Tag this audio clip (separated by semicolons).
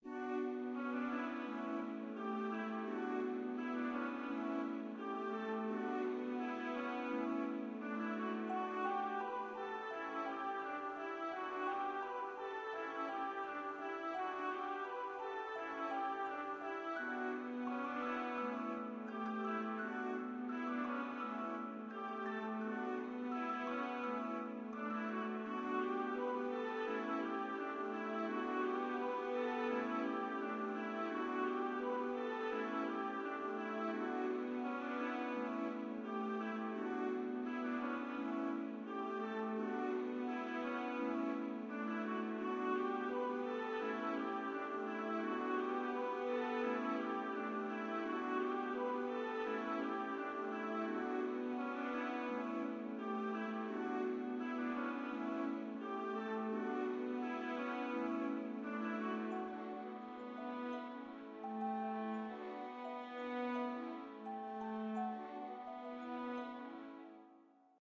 loop; ambient; relax; improvised; music